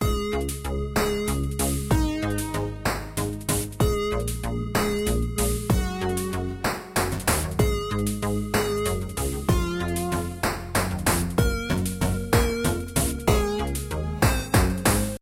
A happy synth loop in a 3/4 waltz.
cheerful, digital, electronic, fun, happy, loop, melodic, music, musical, soundtrack, synth, synthesized, synthesizer, thirds, Upbeat, waltz